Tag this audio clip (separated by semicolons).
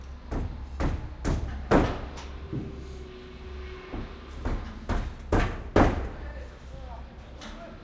hammer; building; environmental-sounds-research; field-recording; construction